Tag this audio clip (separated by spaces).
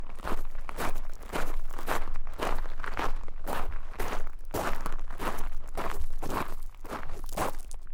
Fast Gate Gravel Lackadaisical Walk